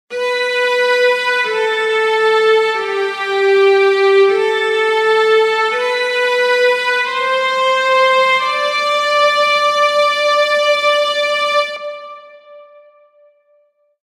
A short, sad violin cue created in Soundtrap.